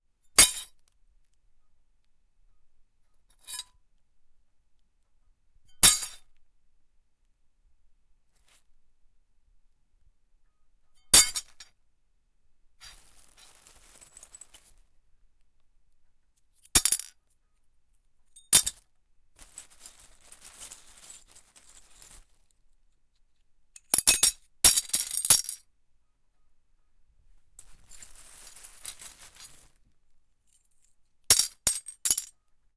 Glass on Glass
Broken pieces of a Coor's Light glass being dropped on a plastic bag containing other broken pieces. Near the end smaller pieces are being used.
breaking, broken, cup, glass, smash, smashing